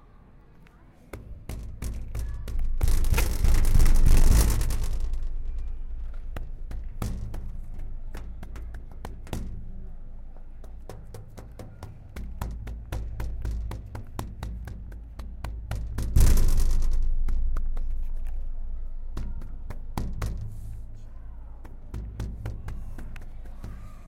SonicSnap Dikra and Cristina02
Sonic snap from Barceloneta neighborhood recorded by the children of Mediterrània school.